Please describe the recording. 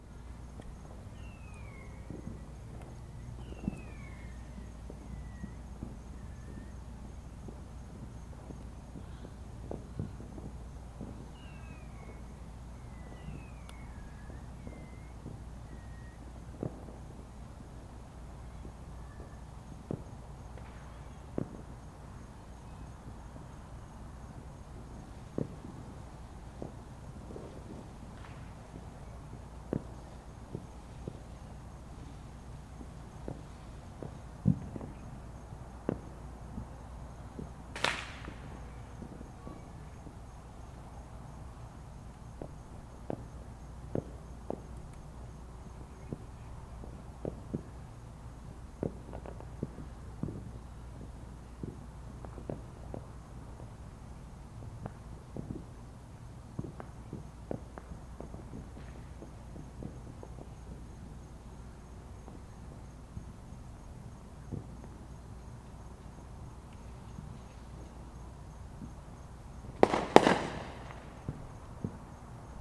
More neighborhood fireworks recorded with laptop and USB microphone.
4th, july, field-recording, holiday, firecracker, independence